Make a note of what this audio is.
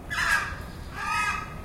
Calls from a pair of Southern Screamers. recorded with an Edirol R-09HR.
bird, field-recording, birds, aviary, screamer, exotic, tropical, waterfowl, zoo, waterbirds